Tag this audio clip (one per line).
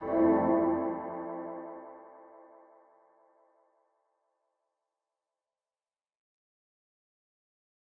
chord
melody